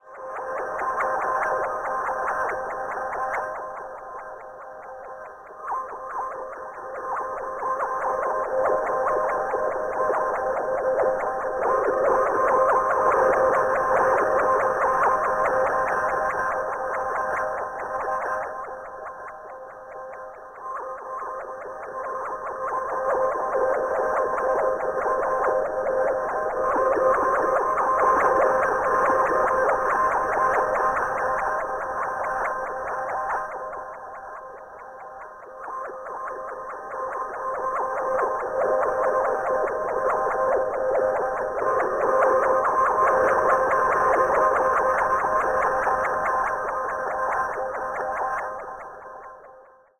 Designed Sci-Fi Atmospheres - Harmonic - 015
A collection of free sounds from the sound library "Designed Atmospheres".
electricity, spaceship, soundscape, sci-fi, pad, fx, power, sfx, drone, noise, transition, effect, processed, ambience